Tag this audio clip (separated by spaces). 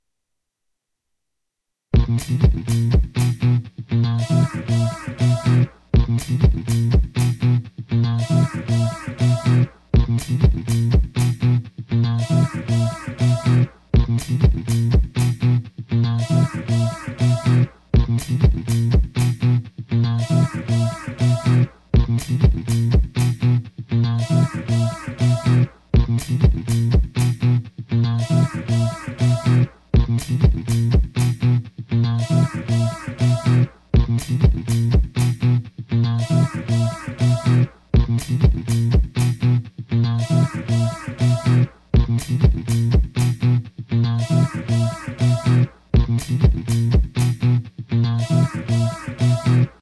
beat bass velocity busy